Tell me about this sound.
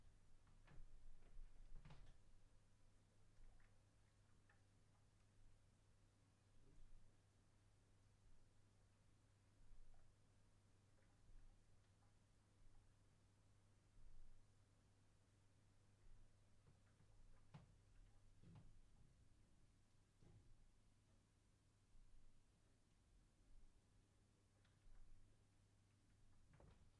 A kitchen Atmo I used fo an movie.
Please write in the comments, for what you will use it :)